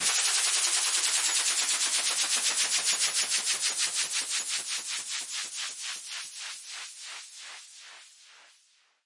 I was trying to make some fx you can hear in Enigma’s tracks (for example ‘The Eyes Of Truth’).
Made with Audition.
P. S. Maybe it’d be a better sound if you listen to a downloaded file.